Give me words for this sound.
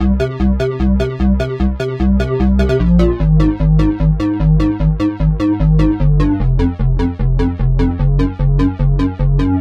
gl-electro-bass-loop-026
This loop is created using Image-Line Morphine synth plugin
trance, synth, bass, loop, techno, electronic